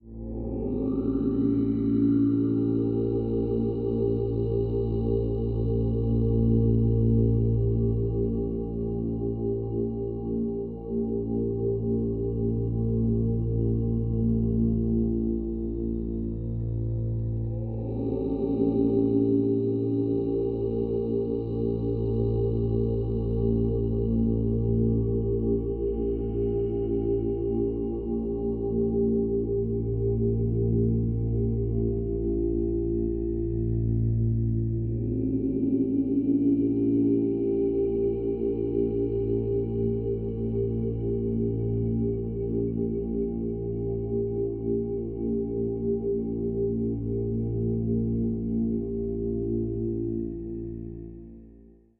Simple, single "Ohm" chant sample by my uncle, processed in Max/MSP (quite basic sample-player-, filterbank-patch) as experiments for an eight-speaker composition.